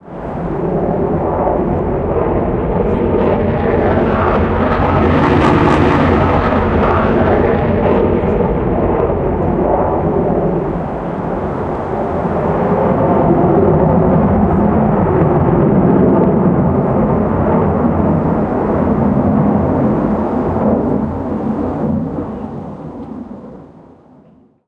Eurofighter Typhoon. Day before Dunsfold Wings and wheels 2015. What a racket, blew the cobwebs outta the house.